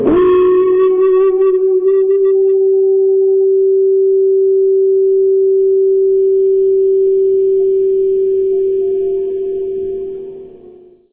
I recorded myself making ringing feedback noise with my guitar through a valve amp, plus some wah.